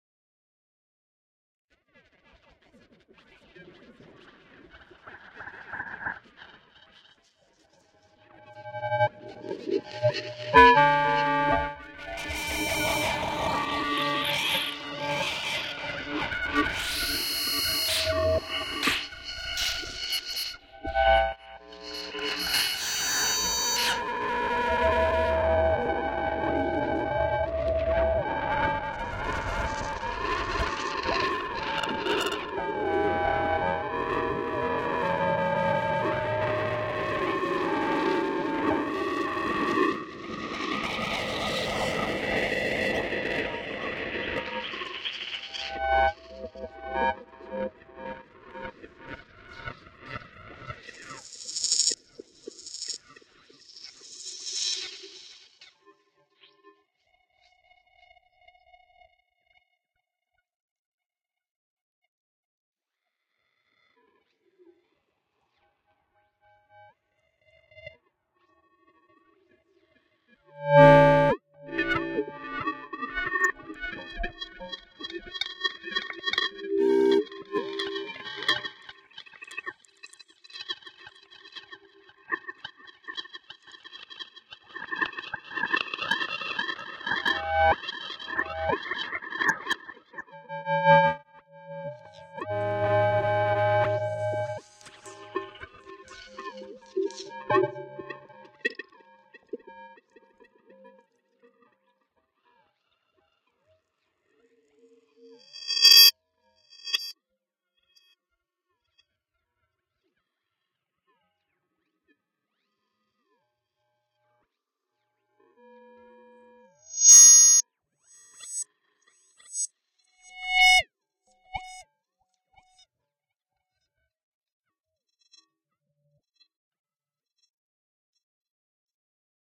Feedback and interferences for sound designers and sound artists